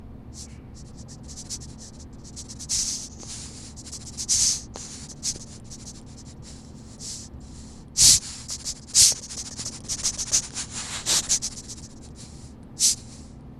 The sound was created by squeezing a tiny rubber bat.